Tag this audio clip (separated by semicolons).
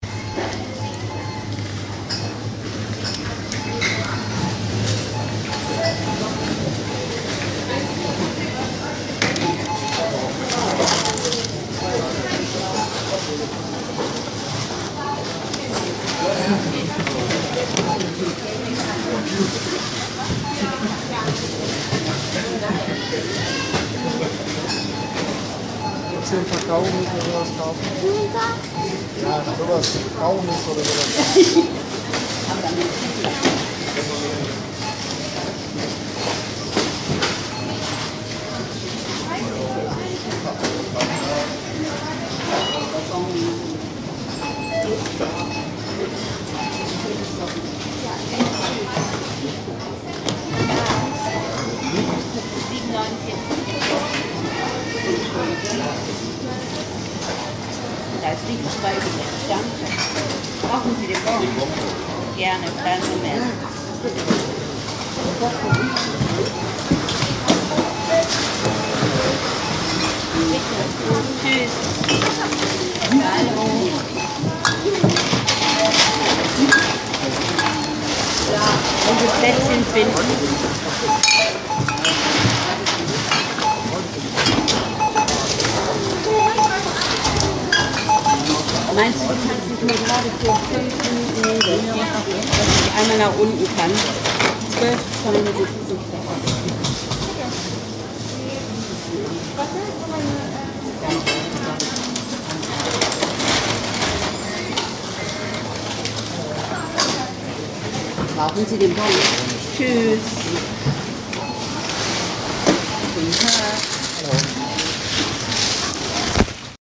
babel; cashout; checkout; counter; german; indoor; supermarket; voices